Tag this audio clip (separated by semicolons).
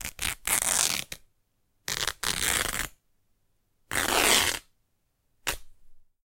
tore; ripped; tearing; tear; rip; cloth; fabric; destroy; clothing; ripping; material